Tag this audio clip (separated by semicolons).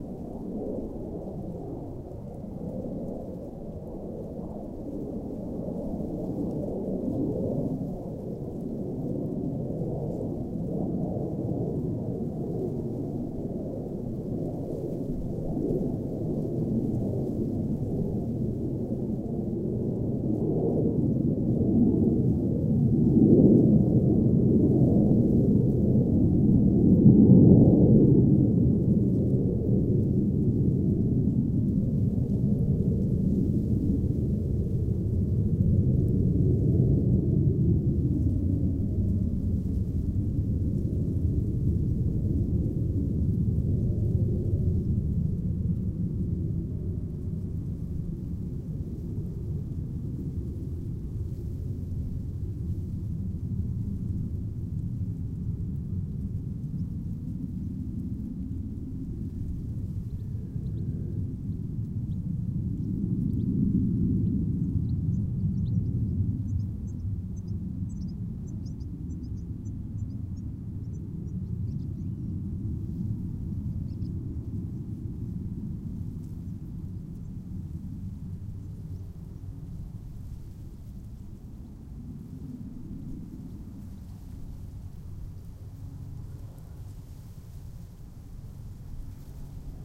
aircraft; plane